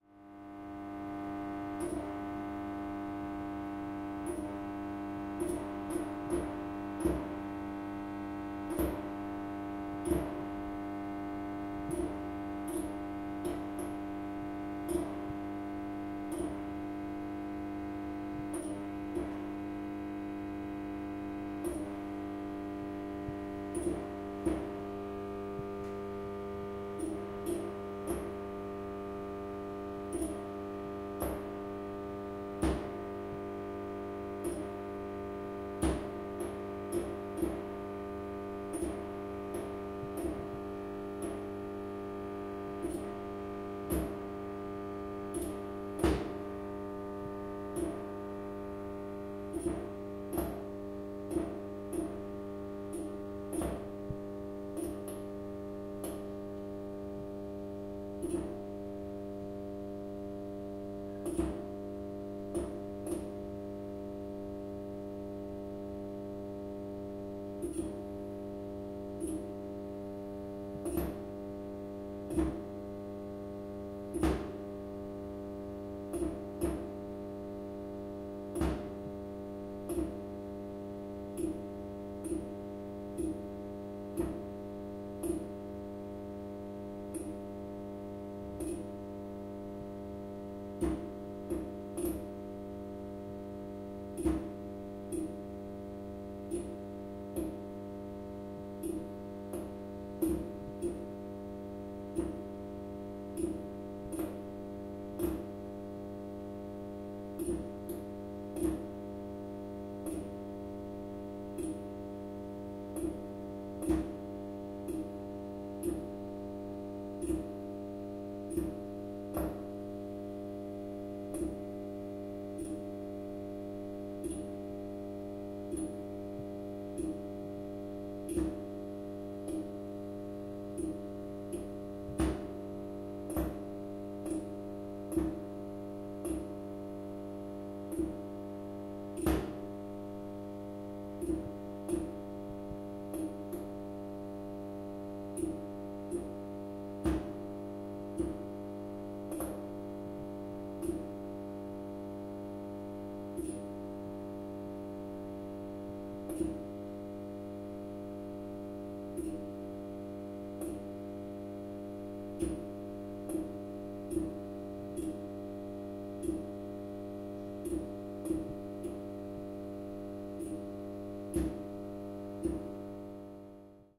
Fluorescent lamp with broken ignitor makes interesting sounds.
fluorescent, lamp